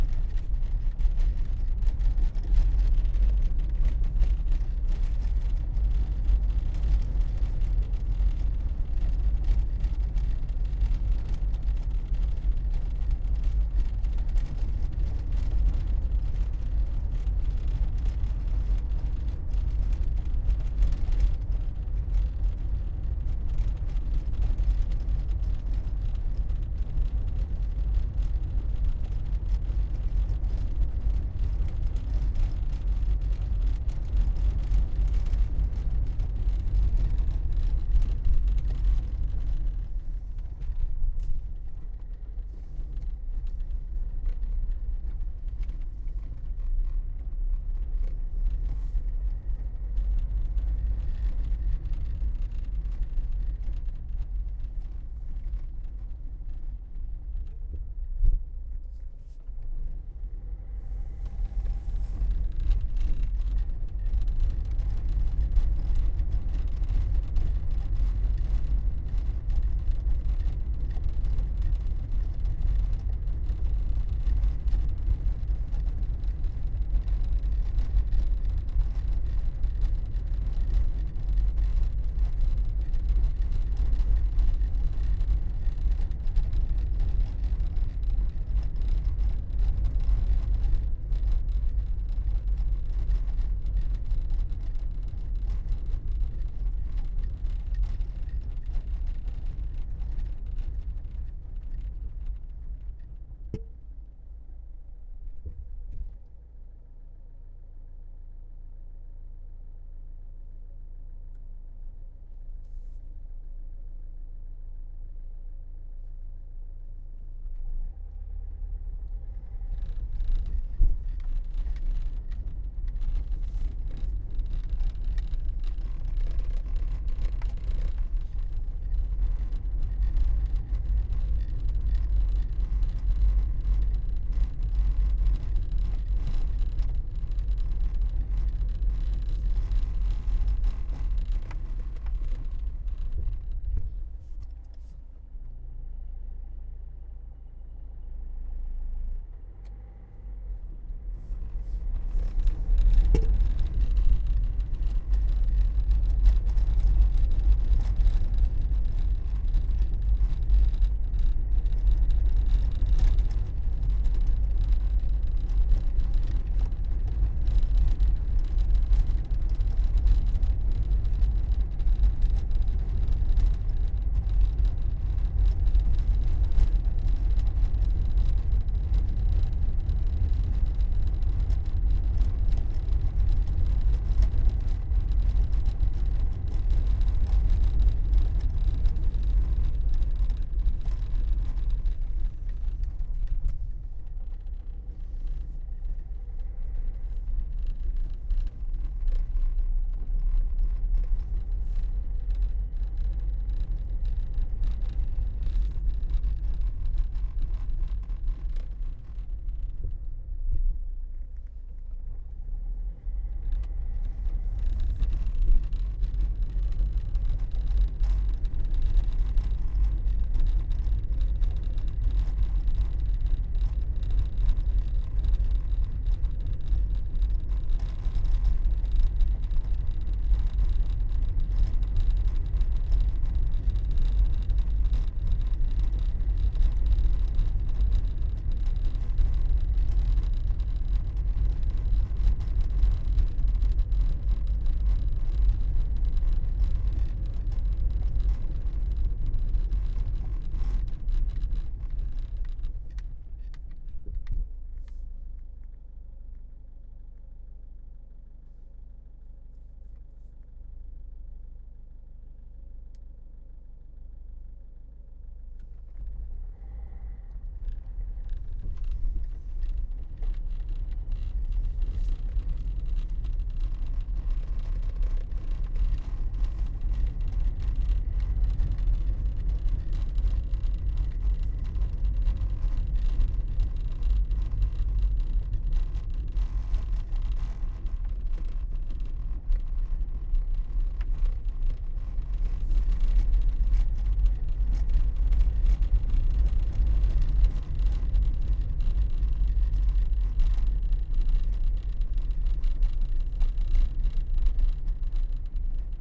Interior car moving on cobblestones
Sound from the interior of a car moving on cobblestones
car, cobbletones, interior, moving